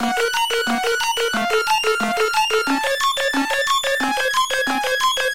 180bpm broken electronic loop (randomized arpegiator)
hardtechno, electonic, 180-bpm, broken, loop